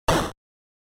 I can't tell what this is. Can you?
8-bit gunshot
A video game sound effect made with Famitracker that could sound like a gunshot
video, shot, blast, shoot, retro, game, gun-shot, gunshot, gun